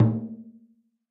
Clean Malaysian frame drum hits from my own collection.